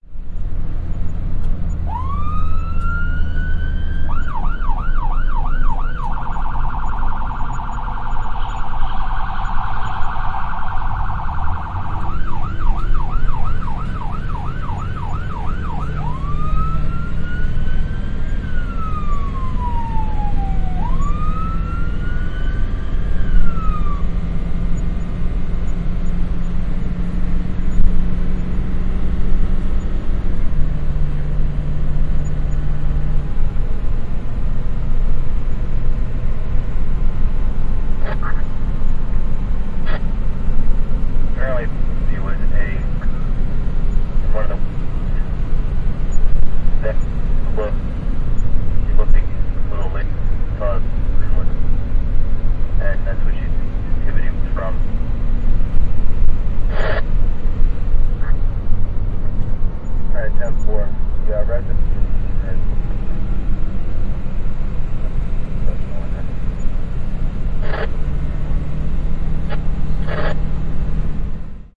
Madison, NJ Ambulance to Morristown Medical Center (Interior Perspective)
Recorded on my Olympus LS14 with CS10M In-Ear mics while taking husband to hospital in Morristown, NJ; I was in front passenger seat.
Ambulance, Emergency, Interior-Perspective, Radio, Siren, Vehicle